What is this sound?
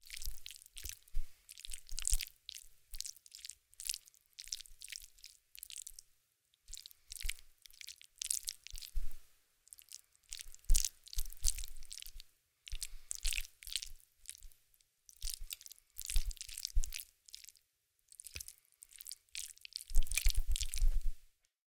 Eat Slime 01
I eat some slimy food and just wanted to record it for later use.
eating, food, greasy, oozy